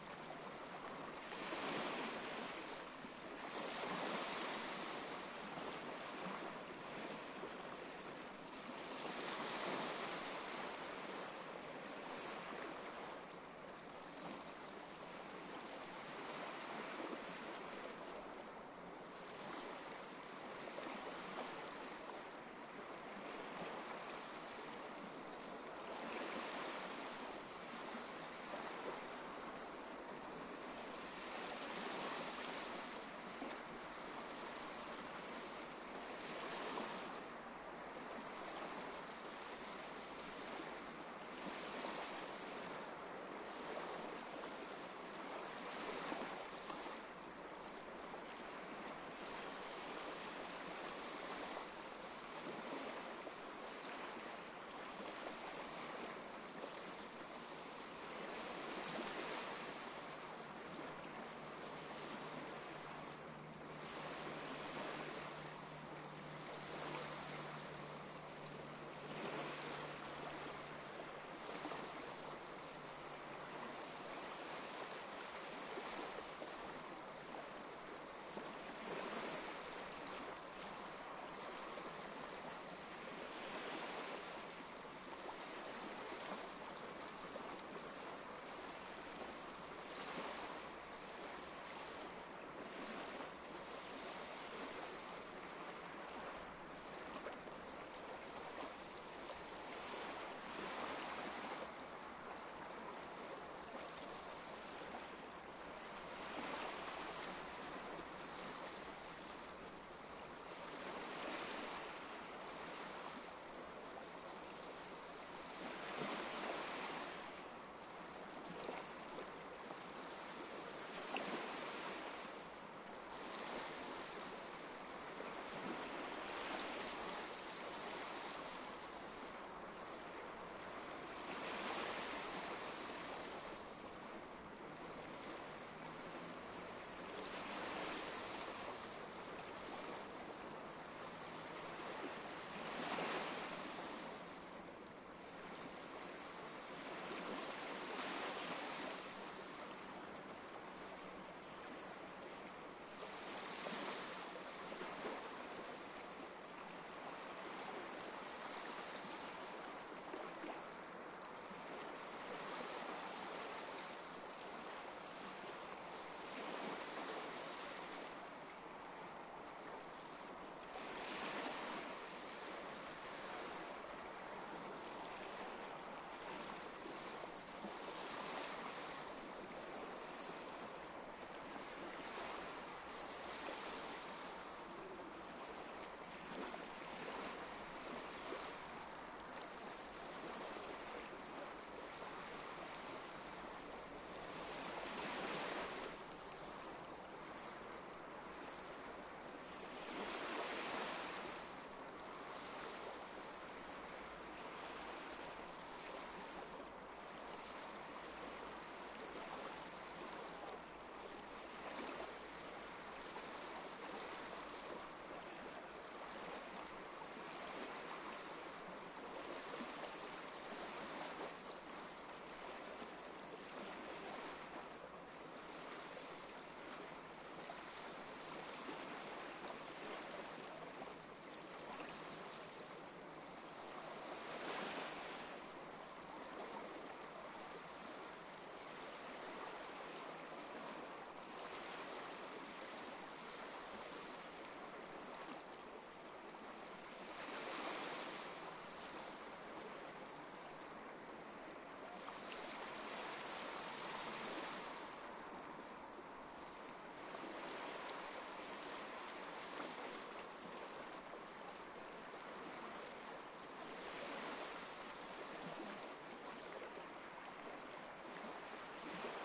This was recorded one of those rare days, with very little wind. So the waves seems to almost not touch the sand, before they venture out again. Recorded with a Zoom H2.